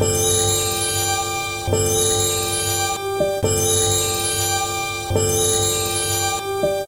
0-bend (140 bpm)
Tape music, created in early 2011
lush, lyrical, nugget, tape-music